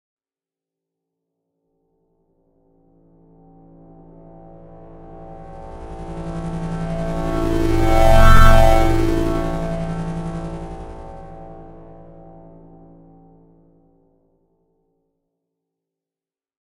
Starting with some real sounds (namely electric guitar), some odd choices of processing, and ultimately some reverse blending, I was aiming for just something to throw out there for a Dare (Continuum-5). But it might be useful for something, for someone, somewhere, sometime.